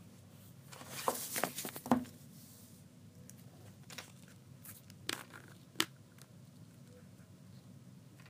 Playing With Bionics 2
binoculars, brush, fiddling, high-quality, hit, hits, metal, noise, objects, random, scrapes, taps, thumps, variable, voice